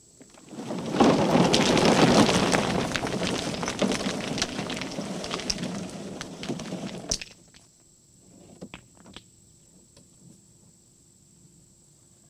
Dumping a wheelbarrow full of rocks on the ground.
wheelbarrow, gravel, rocks